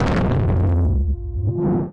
sherman shot bomb34 atmosphere sweep drive
I did some experimental jam with a Sherman Filterbank 2. I had a constant (sine wave i think) signal going into 'signal in' an a percussive sound into 'FM'. Than cutting, cuttin, cuttin...
deep, analouge, bomb, atmosphere, massive, shot, hard, percussion, filterbank, sherman, drive, blast, sweep, analog, artificial, harsh, perc